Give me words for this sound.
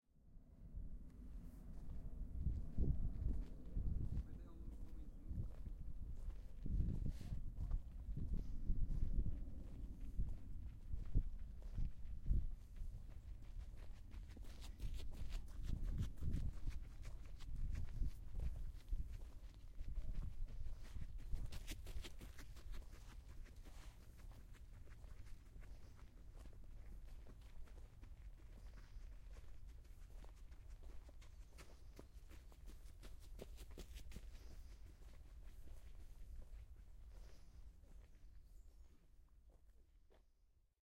People running towards us iat Porto's Parque da Cidade.
cam, cidade, da, parque, people, running, ulp, ulp-cam